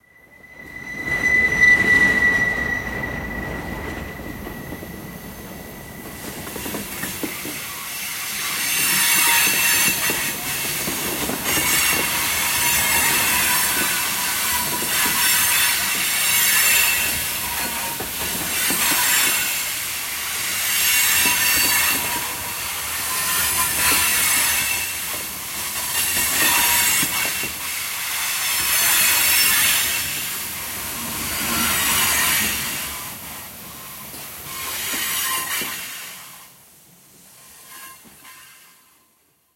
Created by Kimathi Moore for use in the Make Noise Morphagene.
“The sounds I've tried sound very good for the Morphagene i hope, and are very personal to me. That was bound to happen, they're now like new creatures to me, listening to them over and over again has made them very endearing to me. I also added my frame drums which I thought would be a good addition, sound tools, heater, Julie Gillum's woodstove, and a small minimalist piano composition.. In addition to the roster 2 of them are from Liz Lang, whom I wanted to include here as she was my sound/composition mentor.”